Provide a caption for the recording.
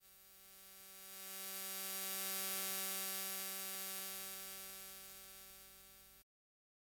PAN ElFy SFX Buzz Light 2
buzz, design, effect, electric, electrical, electricity, electrify, fuzz, noise, sfx, shock, sound, spark, swirl, volt, voltage, zap